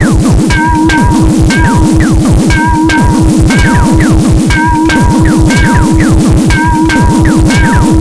Aerobic Loop -28
A four bar four on the floor electronic drumloop at 120 BPM created with the Aerobic ensemble within Reaktor 5 from Native Instruments. Very weird and noisy experimental electro loop. Normalised and mastered using several plugins within Cubase SX.